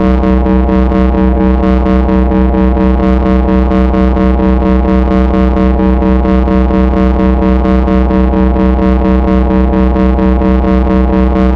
Oscillating energy [loop] 03
Created using an A-100 analogue modular synthesizer.
Recorded and edited in Cubase 6.5.
It's always nice to hear what projects you use these sounds for.
city, sci-fi, retro, shield, 60s, spaceship, science-fiction, 50s, energy, electronic, ambience, conduit, field, drone, machine, space, classic, synthetic, loop, scifi